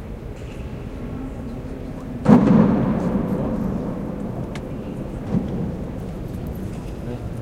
Stpauls 03 door slamming
In St.-Pauls Cathedral we hear a slamming door.
field-recording, church, slamming, door, cathedral